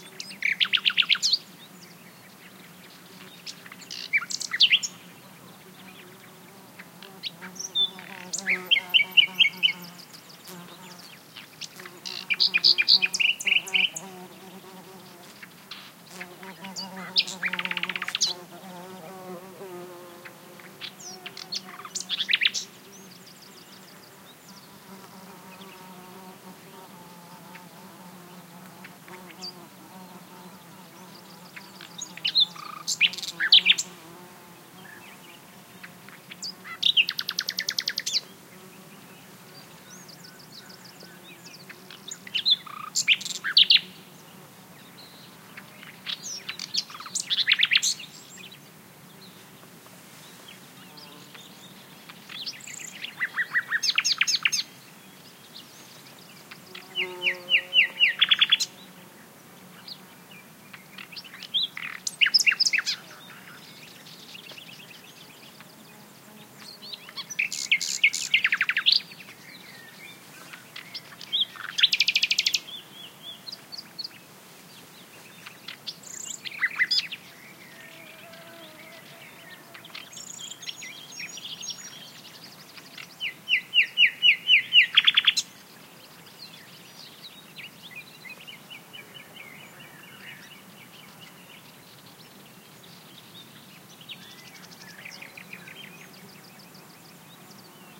Nightingale song, and some bees buzzing around. At times noise from my cloths can also be heard (sorry, I need a longer mic cable). Gear: Sennheiser ME66+MKH30 into Shure FP24, recorded in an Edirol R09 and decoded to M/S stereo with Voxengo free VST plugin.
birds, buzzing, nightingale, field-recording, ambiance, nature, spring, south-spain